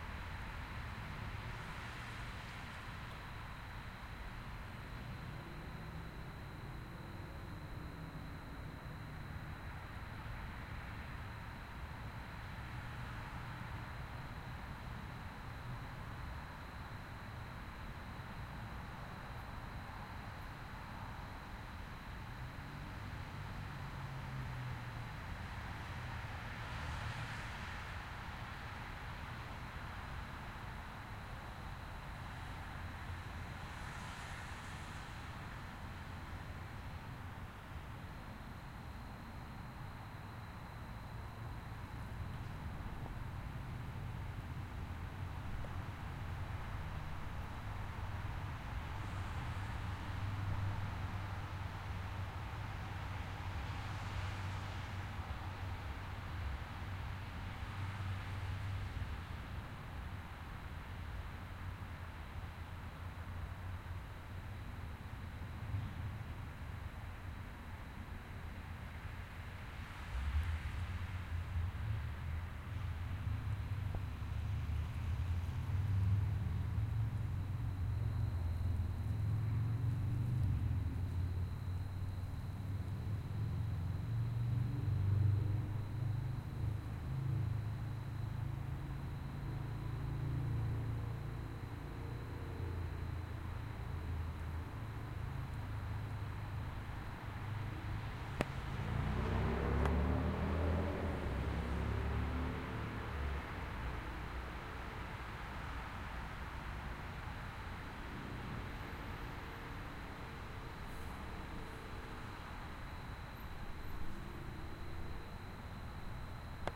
little forest near the street with bugs
walking around little forest near the street
field-recording, ambience, traffic, forest